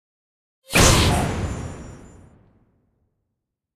FUN-EXPLOSION01
A fun hit I used for several "instant" explosions in 2D animation.
bam, bang, boo, boom, cracker, explode, explosion, explosive, glitter, gun, july, kaboom, pop, pow, shot, tnt, xplode